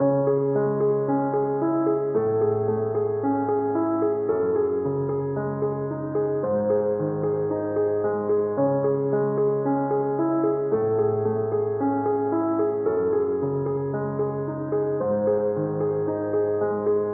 Dark Serious Piano (Weaknd) - C#min - 112bpm
spooky, cinematic, rap, keys, hiphop, serious, piano, emotional